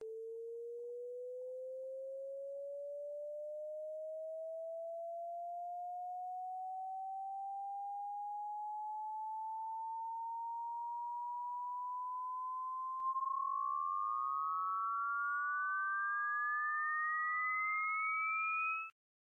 beam sine
Sine buildup/rise made in Audacity with various effects applied. From a few years ago.